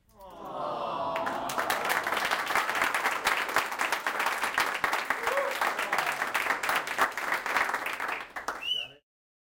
symp-applause 1
Group of people saying "awwwwww" followed by supportive applause
According to the urban dictionary: "Awwww" is used to express a sentimental reaction to warm fuzzy experiences. (The number of W's at the end is arbitrary, but at least two or three normally occur in this word.) Also used as an expression of sympathy or compassion.
applause, crowd, group, human, sympathy